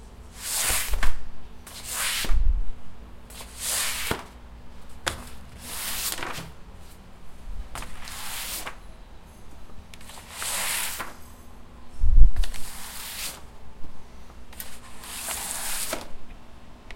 Sliding Paper Folder
A paper folder sliding.
Recorded using TASCAM DR-40 Linear PCM Recorder